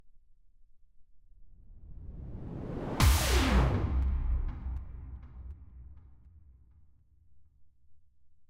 whoosh sci fi
Very usefull sample for transition within two parts. To bring the chorus for example. It sounds a bit electric with an sound effect made with synth.
I use it all the time hehe.
Made with : white noise filtered, drum layering, big plate reverb...
Tip : It was not very processed in order to make your own process like sidechain on reverb tail, envelope....etc